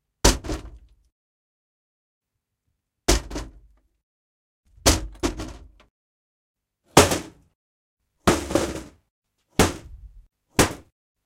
banging window wooden frame
Banging a small window frame
bang, wooden